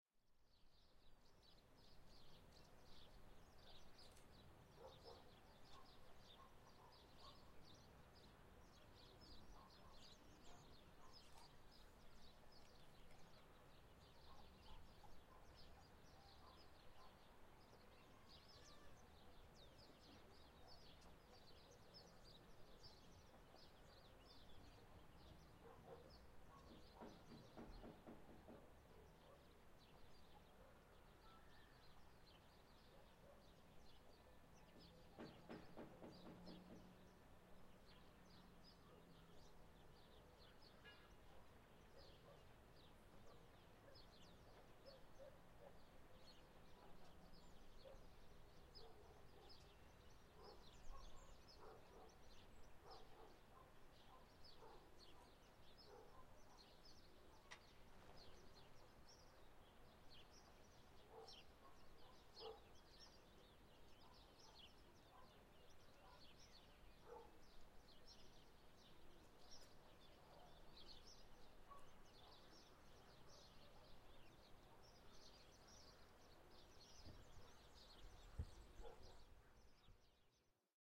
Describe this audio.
Ronda - Chefchauen Walk - Paseo de Chefchauen
The birds sing in Chefchauen Walk, near the ancient City Walls in Ciudad de Ronda (Málaga, Spain). Recorded in a quiet Sunday morning with a Zoom H4N.
Los pájaros cantan en el Paseo de Chefchauen cerca de las antiguas murallas de la Ciudad de Ronda (Málaga, España). Grabado una tranquila mañana de domingo con una Zoom H4N.
trees, Ronda, arboles, birds, Spain, pajaros, paisaje, Andalusia, countryside, Espana, Andalucia, quiet, tranquilo, landscape, campo